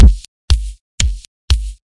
kick loop06
compressed kick loop variations drum beat drums hard techno dance quantized drum-loop groovy kick
loop, kick, quantized, hard, drums, dance, beat, drum, groovy, variations, drum-loop, techno, compressed